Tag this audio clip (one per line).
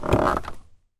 car
clutch
interior
pedal
pressed
volvo